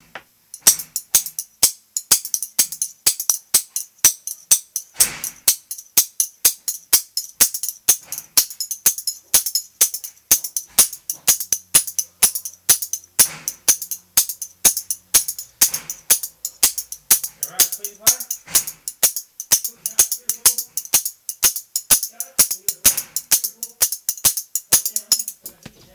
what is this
playing the spoons
metal, music, quick